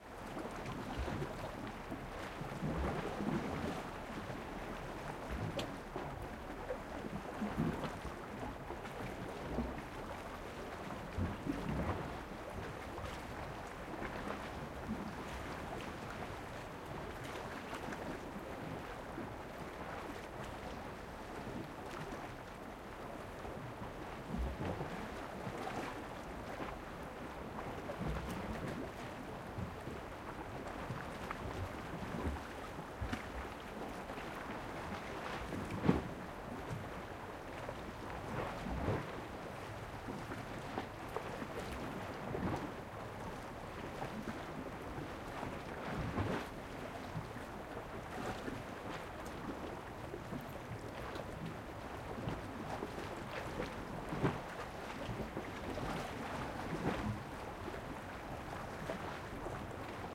Summer in Kimolos at a very famous beach with rocks. The recording is the stereo version of a DMS recording.